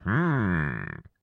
Hmmmm! (Male)
A man making the sound "Hmmm" in an approving manner.
Approval, Excited, Grunt, Happy, Hmmmm, Man